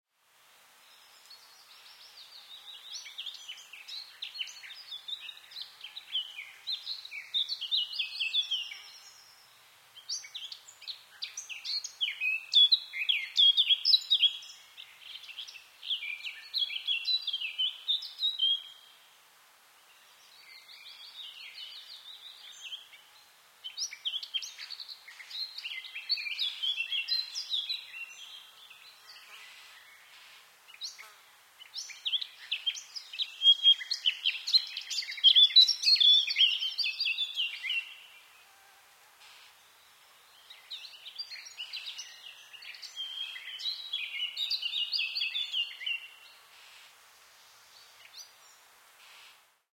Suburban Forest Birds
The sound of birds singing in springtime in a suburban forest. It was recorded with the internal microphones of Sony PCM-D100 and then filtered to remove low-frequency rumble.
ambience, field-recording, birdsong, nature, spring, suburban, ambiance, birds, bird, forest